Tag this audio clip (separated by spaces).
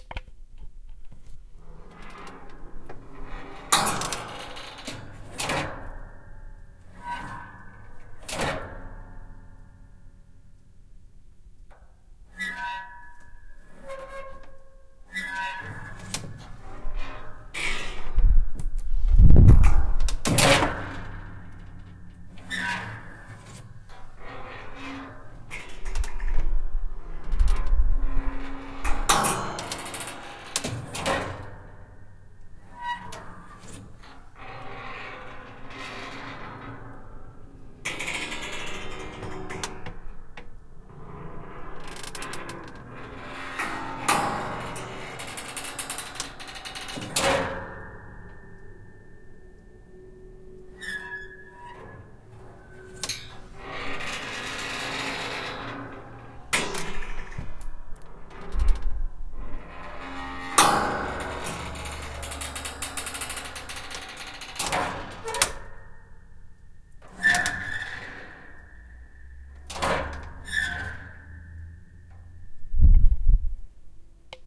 abstract creak creaking creaky creepy door hinges menacing metal old springs squeaky wood